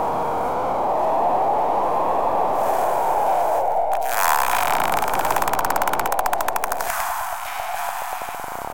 sustained pad joined later in the loop by a phased glitch; made with Native Instruments Reaktor and Adobe Audition
2-bar, ambient, glitch, industrial, noise, pad, processed, sound-design